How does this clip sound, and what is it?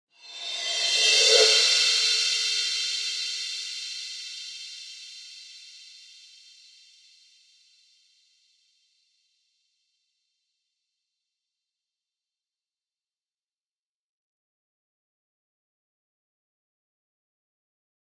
Reverse Cymbals
Digital Zero